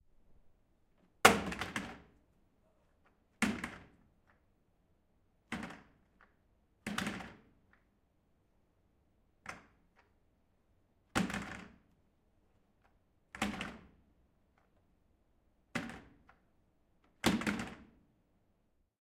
Bucket dropping
Recorded with a zoom H6. Dropping a big bucket on a hard floor multiple times.
drop, plastic, hard, OWI, floor, variations, bucket